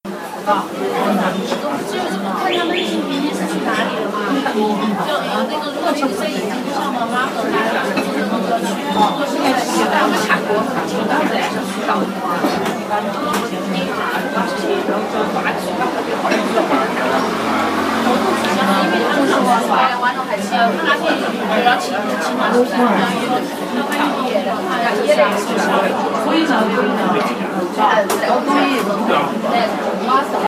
Chinese Restaurant 1
Sounds captured on my iPhone 4 from inside a local restaurant in Kunming China.
iPhone, street-sounds, live-captures, China